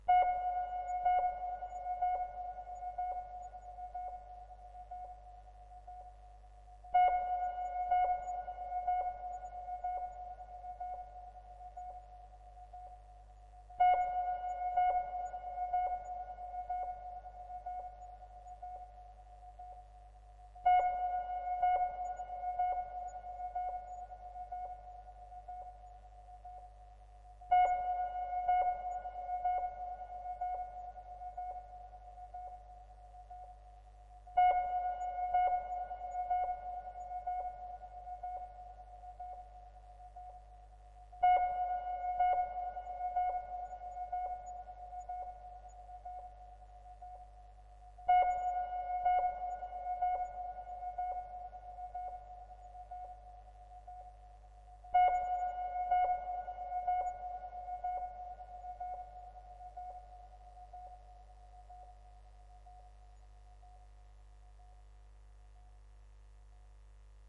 approaching ceres
ambience, ambient, atmosphere, claustrophobic, cold, cosmic, drone, field-recording, hyperdrive, hyperspace, industrial, interior, interstellar, lounge, relaxing, sci-fi, soundscape, space, spaceship, ventilation, vessel